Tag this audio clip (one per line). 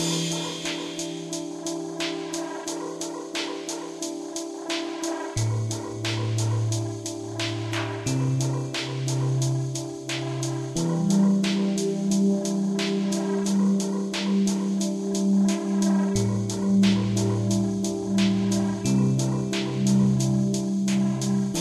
Philosophical
indiedev
Thoughtful
indiegamedev
games
game
videogames
gamedeveloping
gamedev
music-loop
sfx
gaming
loop
video-game
Puzzle
music
videogame